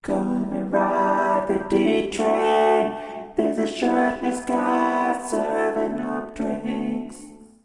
An ode to my next door neighbor, we call him D-train.